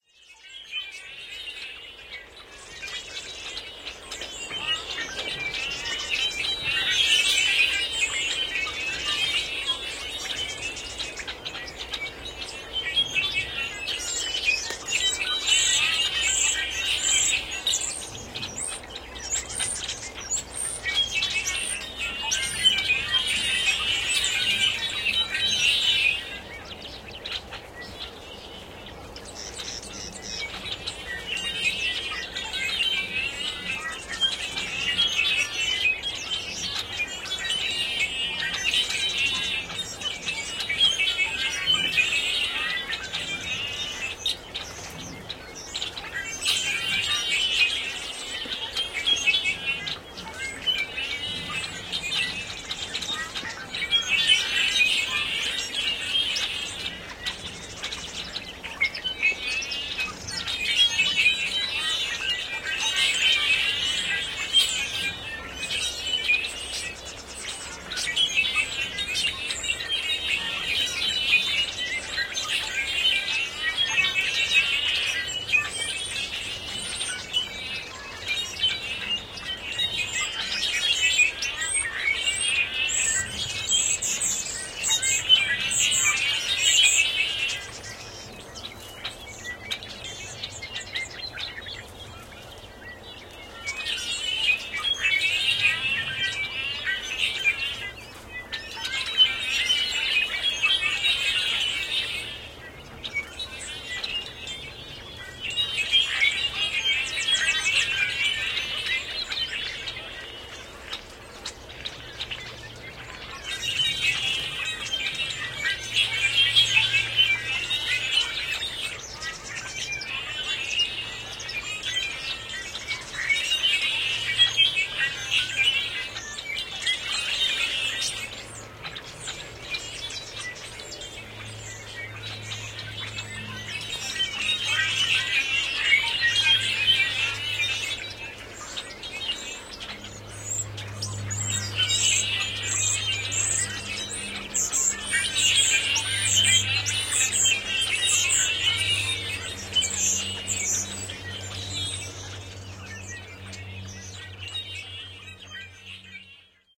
TB1 track05
This recording was done February 27th, 2009, on Sherman Island, California.
sherman-island, blackbirds, california